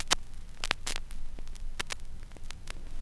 Short clicks, pops, and surface hiss all recorded from the same LP record.